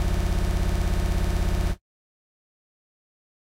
Skreechy hard drive
This was synthesized. No hard drives were harmed in the making of this sound.
broken, computer, disc, disk, drive, hard, hard-disc, hard-disk, hard-drive, hdd, machine, motor, rattle